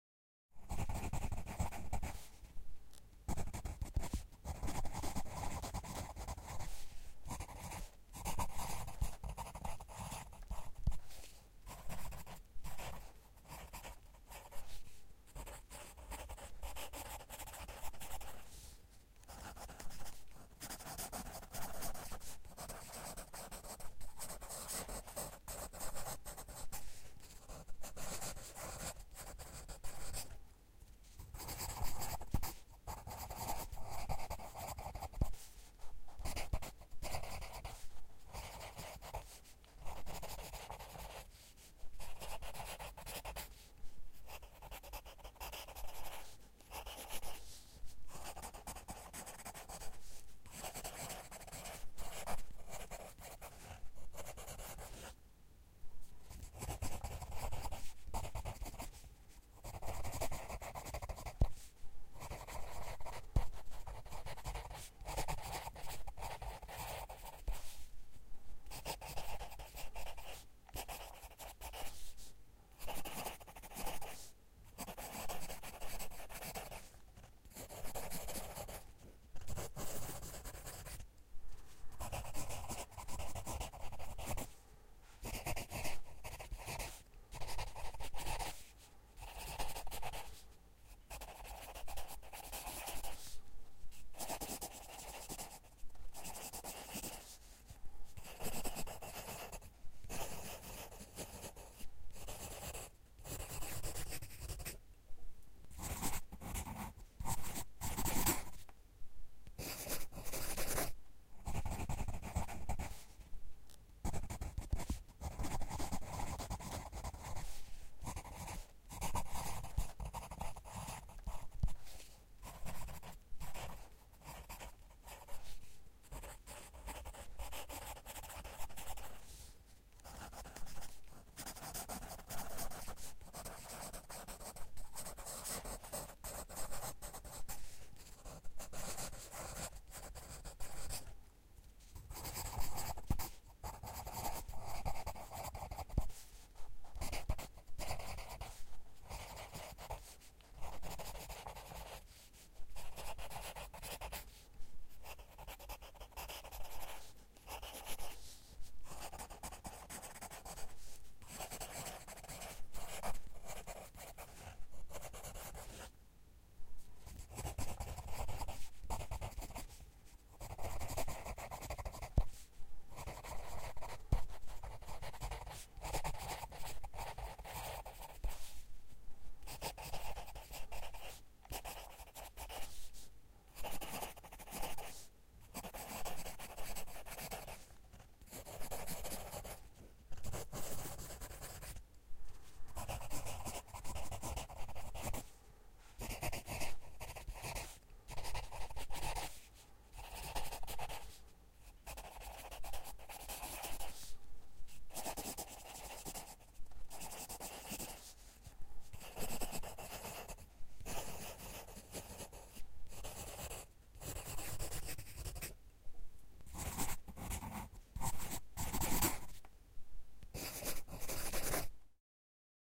Writing with pencil. Recorded with Behringer C4 and Focusrite Scarlett 2i2.
draw, write, drawing, pencil, paper, writing